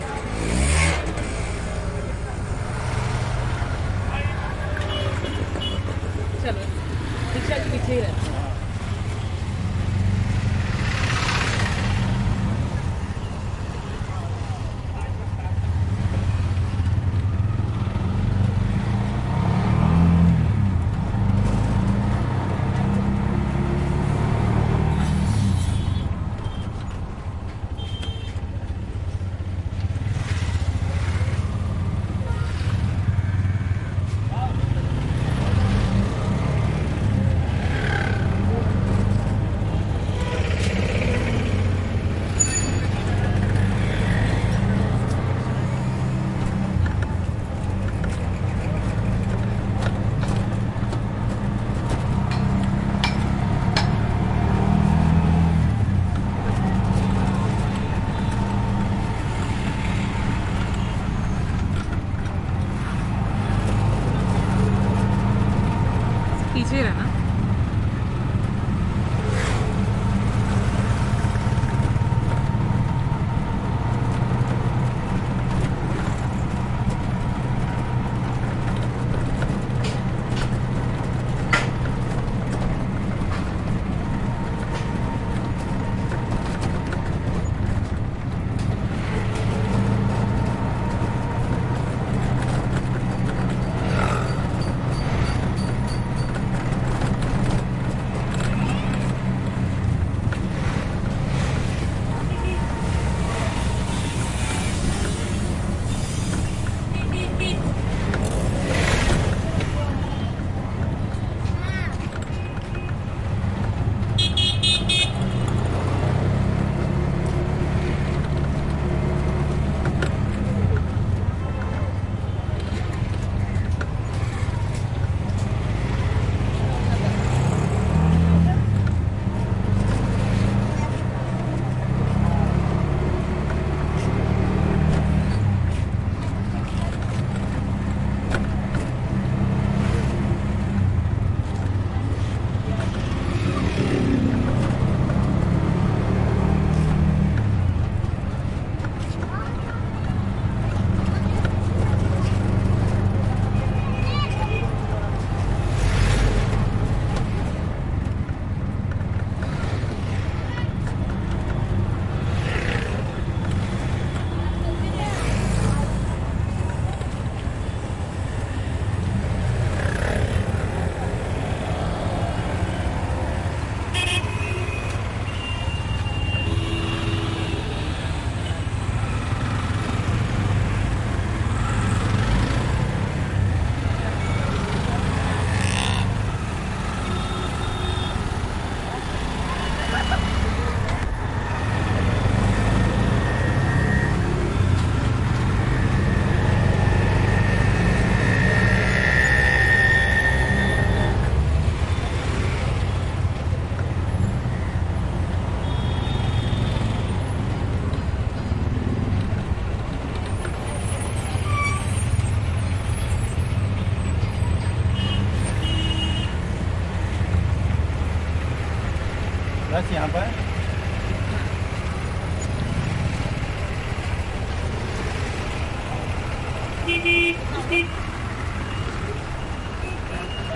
rickshaw ride fast speed smooth traffic pass by India
speed, smooth, rickshaw, by, ride, pass, traffic, India, fast